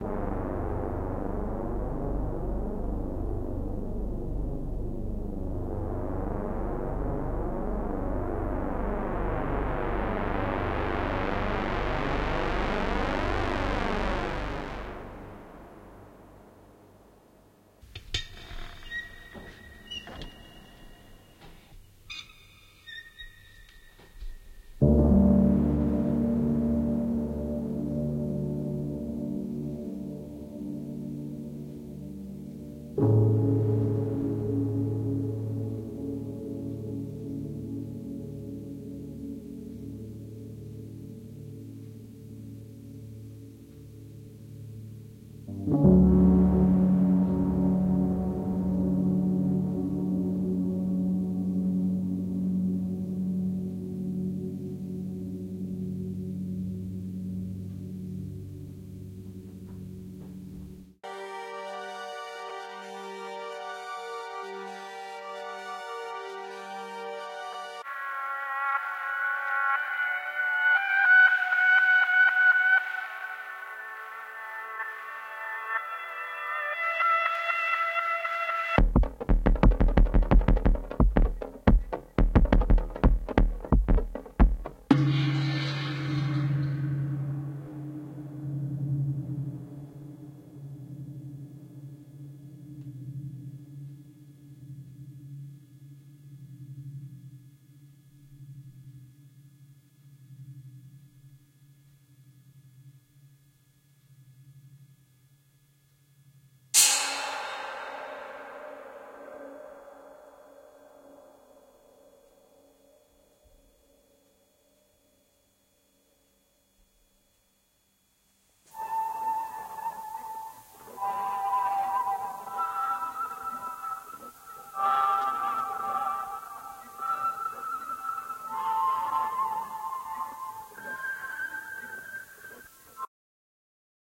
"Noir" Reel by Hainbach
Created by Hainbach aka Stefan Paul Goetsch, formatted for use in the Make Noise soundhack Morphagene.
"I call it Noir, since it is has a vibe of film noir to it. I made this with scoring for picture or theatre in mind, recording piano, percussion, synths on a Telefunken M15 and Nagra III and playing that back on half speed. All music is harmonically related, so it should not grind too much when switching apruptly. I left some space for new splices in the end, as I feel that makes it more playable."